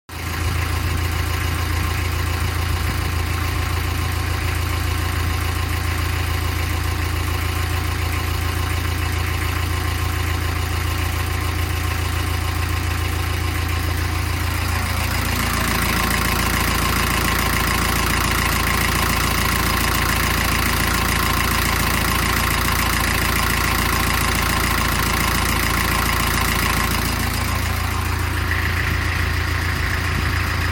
engine of my narrowboat Celestine